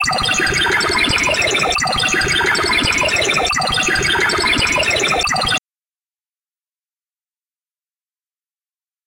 imaginary lab sounds